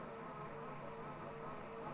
12 CUE LOOP
Recording of a Panasonic NV-J30HQ VCR.